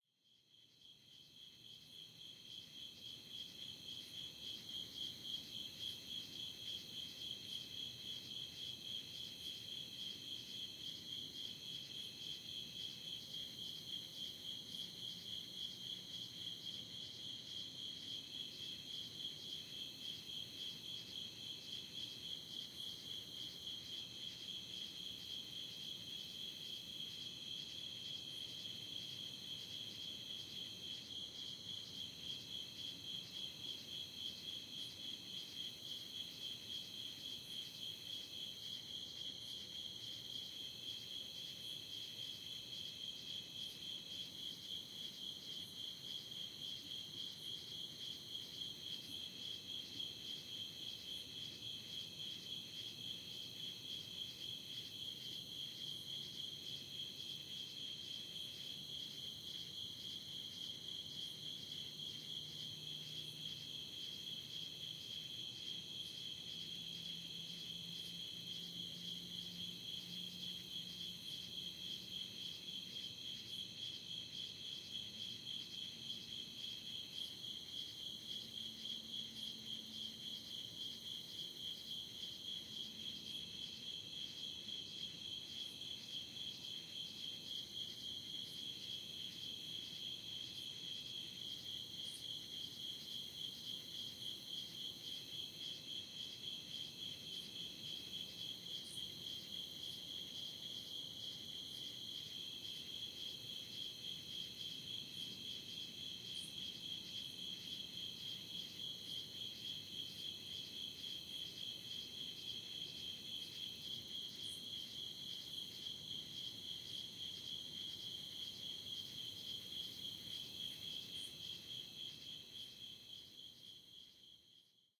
AMB Rural Summer Night
Recording of rural summer night in Texas with crickets and various bugs. Recorded with Zoom H4n.
Ambience, Atmosphere, Crickets, Field-Recording, Night, Rural, Summer-Night, Texas